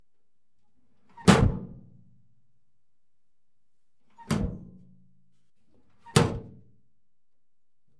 pot lids clattering.
clattering, lids